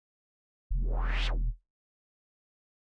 FX Transition Noise 01
A whoosh I synthesized for a transition effect in Mission: Rejected.
abstract, effect, electronic, fx, sci-fi, sfx, soundeffect, soundesign, synth, transition, whoosh